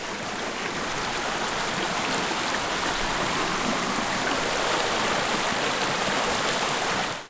Water sounds over rocks and a fallen tree - Whittington Creek
Water rolling down a creek, over rocks and around a fallen tree, in stereo, with the water sounds moving from one ear to the other ear. We got in the van and drove down a rural road in Arkansas, United States. On the side of that road, we found Whittington Creek. We continued and found where this creek enters a man-made underground tunnel in the city of Hot Springs. This sound is used in the beginning of a 7-minute video of our adventure: